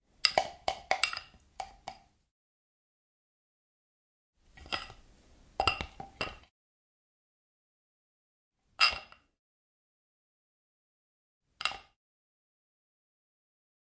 11 - Tootbrush, in cup
Get back toothbrush to the cup. (more versions)